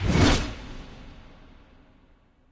Dramatic Camera Zoom
Camera zoom sound for extra effects for your film.